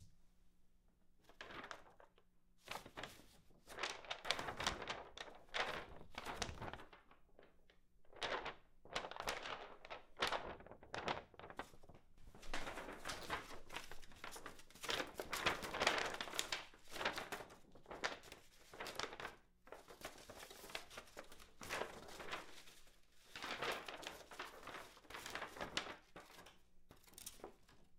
foley paper sheet of white printer paper flap in wind India
printer, paper, flap, foley, sheet, white, India, wind